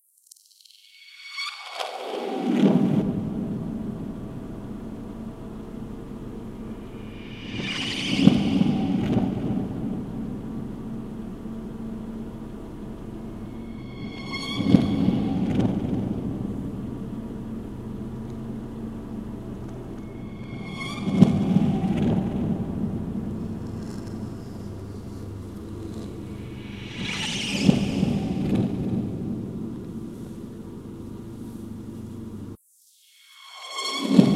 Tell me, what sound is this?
scary wipers
I created this sample by recording my broken windscreen wipers and then edit it in cool edit pro using reverse effect, reverb and time slow down effect.
horror, string, creepy, freaky